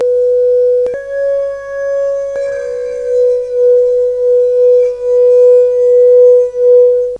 I inject a short stimuli to the wine glass and it starts resonate, but when I lean the half-filled glass another self resonating frequency takes over. You can see the millisecond fast shift after about 2 seconds. Then suddenly, the 1st harmony takes back.
experimental, frequency, resonance, waveshape, wineglass